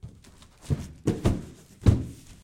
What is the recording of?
Alternate recording of Large cardboard box falling onto ground. Edits into one-shots. Stacks with other from bundle into a good crash. Requires editing.
thumps, random, variable, hits, objects, cardboard-box